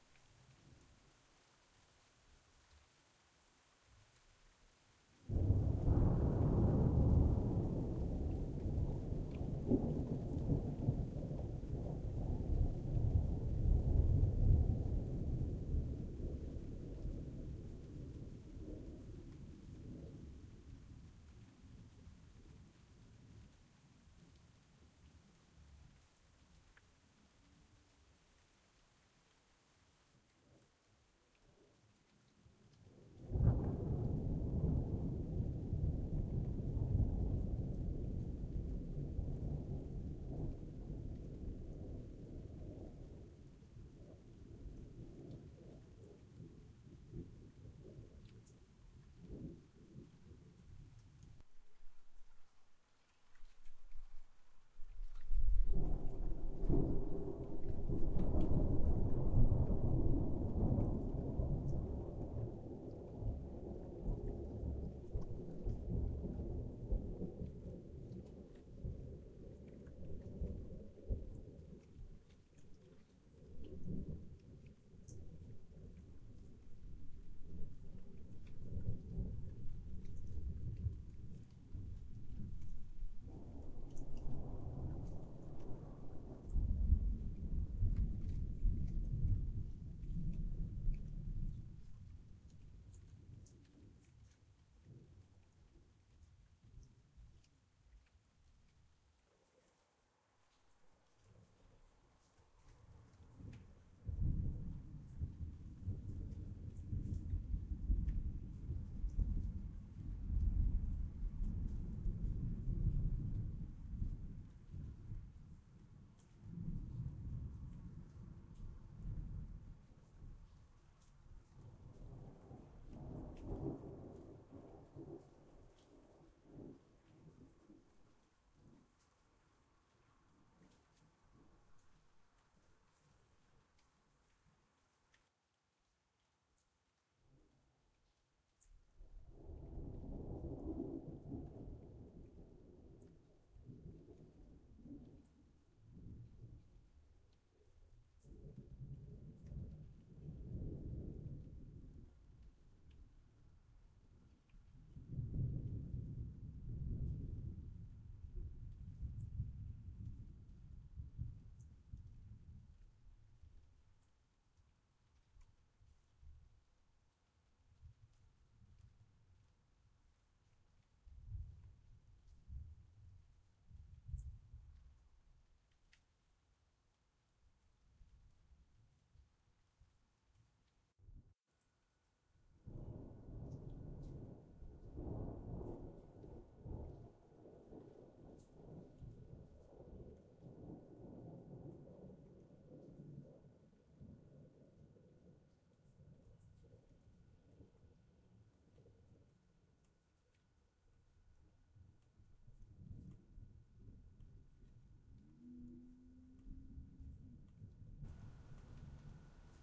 Distant Thunder
Distant rumbling thunder with no rain or other ambient sound.
distant-thunder, far-off-thunder, rumbling-thunder